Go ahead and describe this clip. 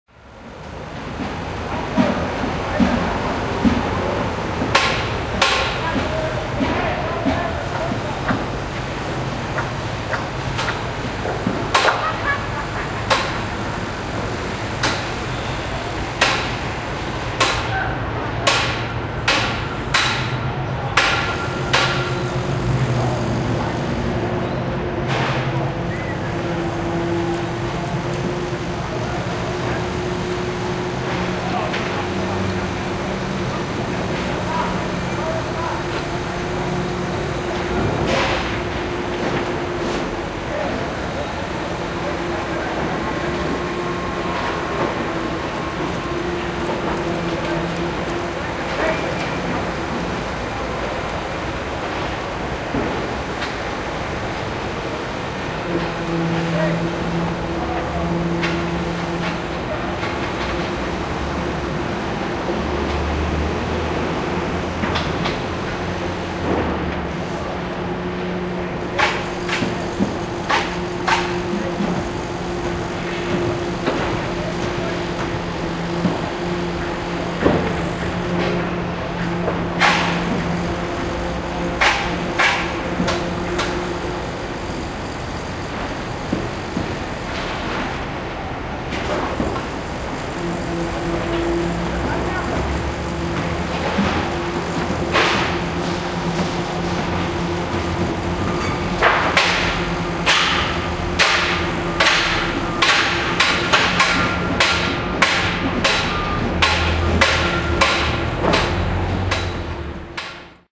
field recording @ construction site (downtown tucson) - hammering, voices, machinery/traffic in background.
construction, hammer, hammering, machinery, voices